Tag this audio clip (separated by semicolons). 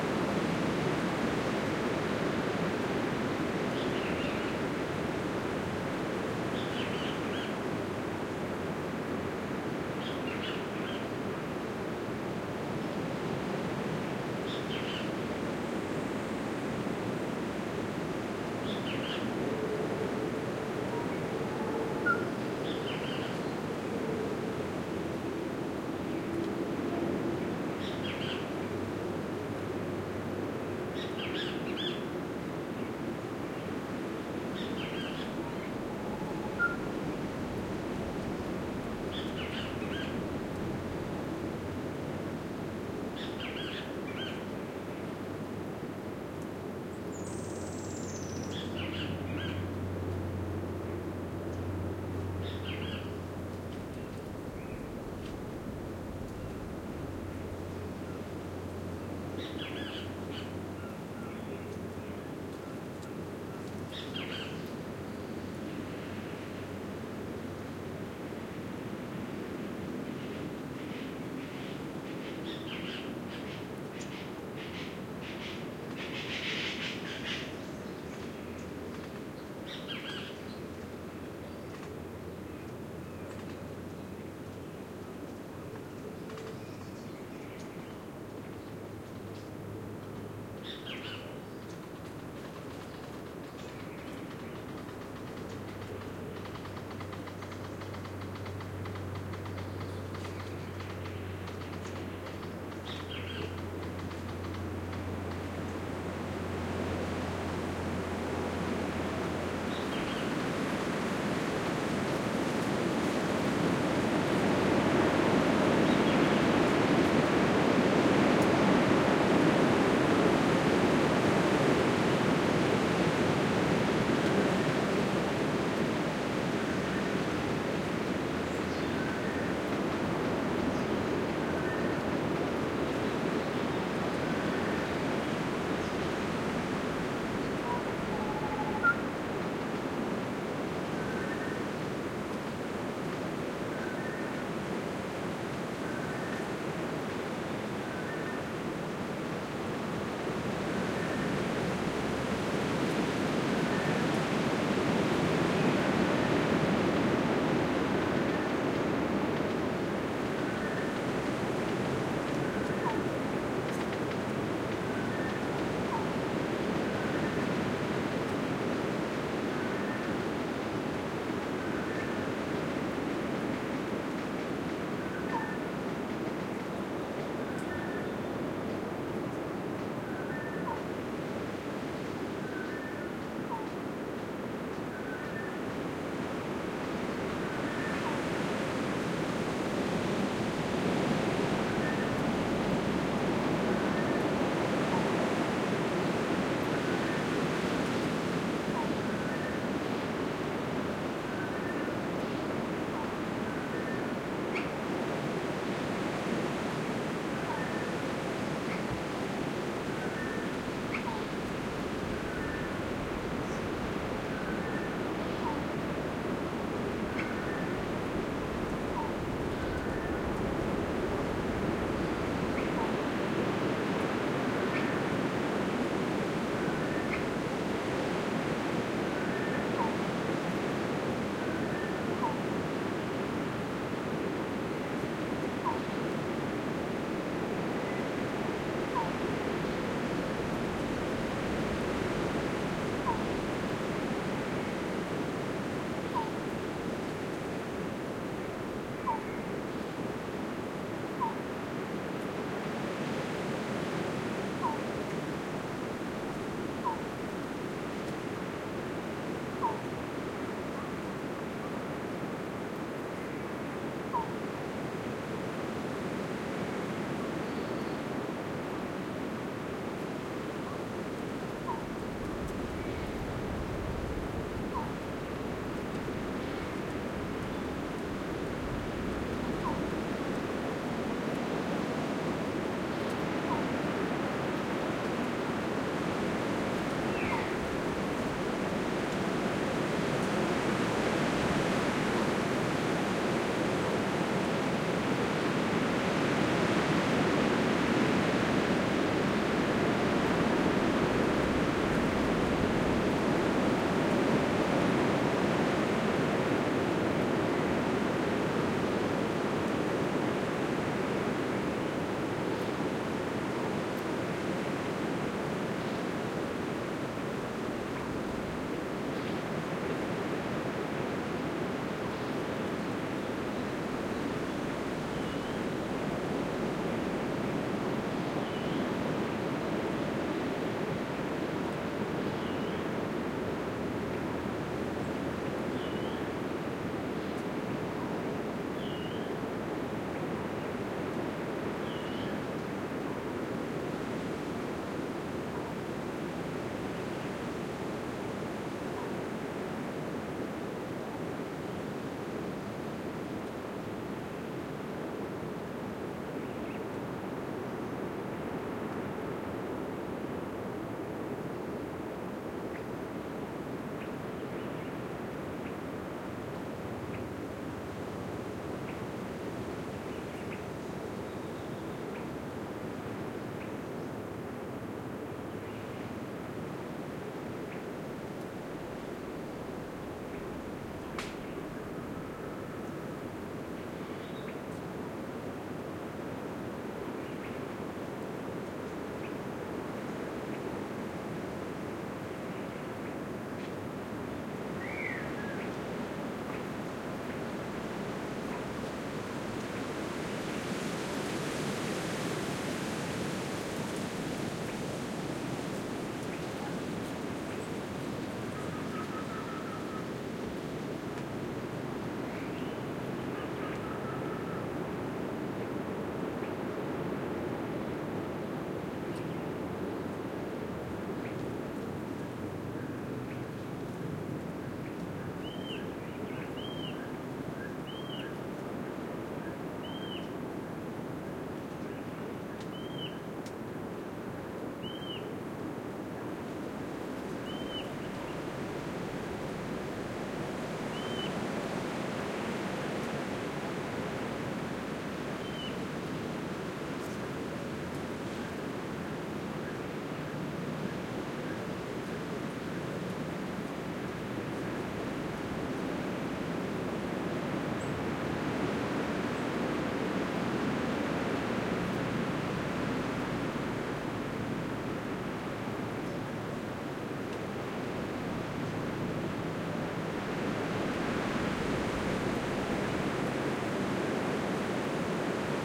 nature; ambient; birdsong; wind; tropical; costa-rica; birds; field-recording; animals; forest; outside